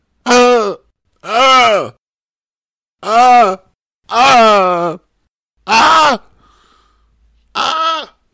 Male Screams
Can be used as a scream in the background, a queue that a male character is in trouble and so on. voice man vocal human speech
Horror, Man, Scream